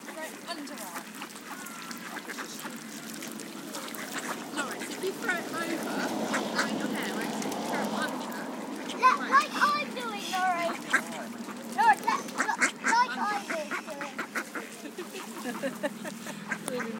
Ducks by the Stream Urban 01
I made this sound next to a stream in an old town in the UK
duck, quack